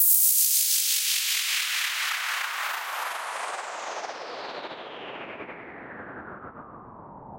Lunar Downlifter FX 4
For house, electro, trance and many many more!